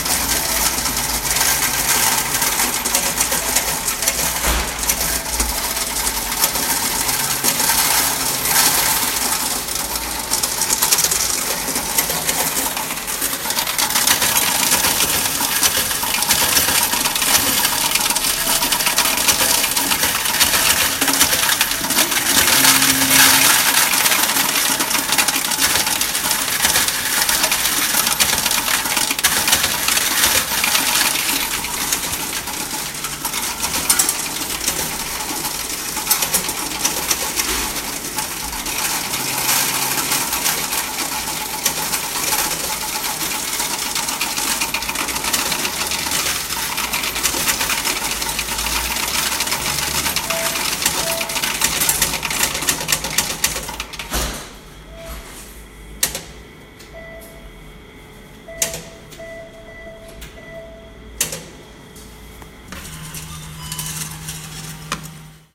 iPhone recording of coins deposited in German bank machine.